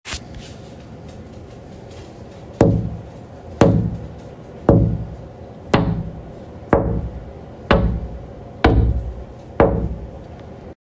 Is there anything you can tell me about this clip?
Finger tapping on a double pane window